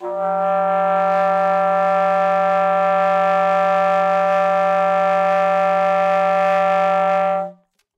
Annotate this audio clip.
One of several multiphonic sounds from the alto sax of Howie Smith.
howie, multiphonic, sax, smith